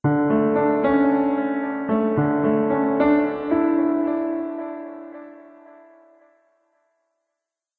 Small narrative sequence, part of Piano moods pack.
delay, narrative, phrase, piano, reverb, sequence